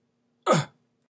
A short grunt of pain.
exhale, scream, exclamation, pain, hit, grunt, yell